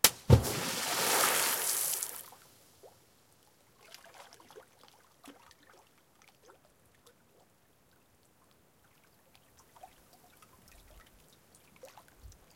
Tossing rocks into a high mountain lake.
splash, percussion, bloop, splashing, water